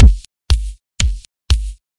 kick loop-05
compressed kick loop variations drum beat drums hard techno dance quantized drum-loop groovy kick
hard, drums, variations, techno, drum-loop, kick, groovy, drum, quantized, loop, dance, beat, compressed